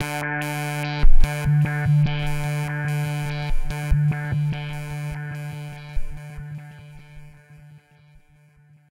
zebra bas with deelay
sound made with ableton live 8. zebra bas+delay+compressor
bass, dub, dubstep, electro, reggae, space